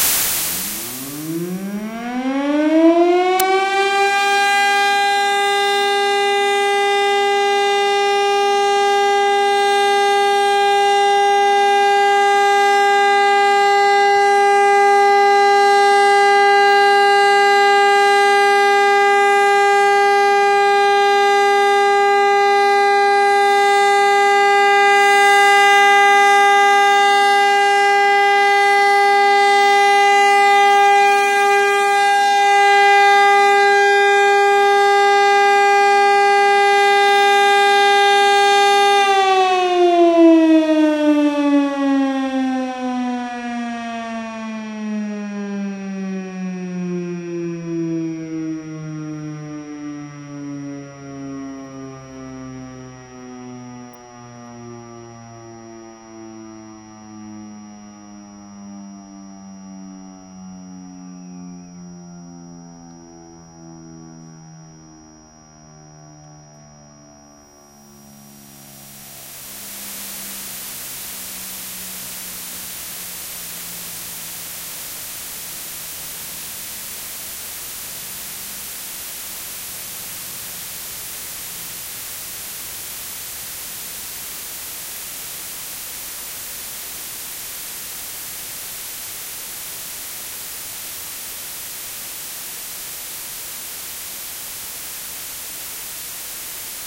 This Old Footage Of Siren Horn Alert From Czech Republic In May 2006!
This May Be A Alert Or Horn That’s Because This An Old Audio I Recorded With My Old Camera I Buy In January 2005! This May Be A Horn Alerts In Random Cities And Towns Wile I Record This In Railways And Signals!
2007, Disk, Old-Camera, Horn, Sound, Czech, Old, Republic